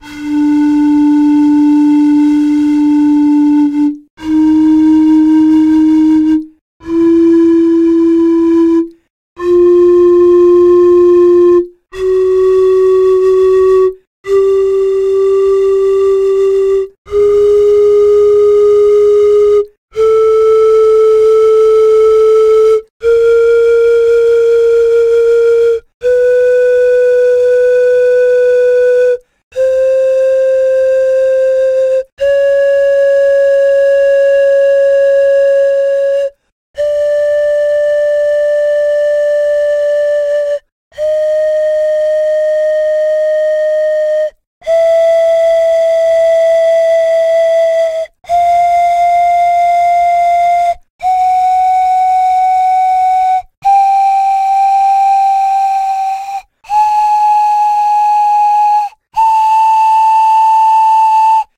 panflute scale octave and a half
to be sliced up and used in a program like SliceX to make a midi controlled instrument. could also be sliced up and placed manually, I suppose.
I used a 3/4 in. diameter PVC tube stopped at one end and water to create all of the notes. the notes have even temperament. they may be slightly off in terms of tuning at the top end, but overall, I'd say not too much.
also, I used a pop filter, but halfway through I realized that for a lot of the notes I was somewhat blowing on the mic (pop filter can only do so much). I'm sure if some were to take the time and listen for it they would, but this effect is not terribly noticeable.
chromatic, flute, instrument, music, musical, note, notes, octave, pan, panflute, pipe, PVC, scale, wind